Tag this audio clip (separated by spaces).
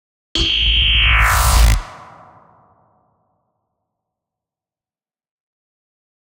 alien beam buzz Laser monster sci-fi spaceship synth synthesizer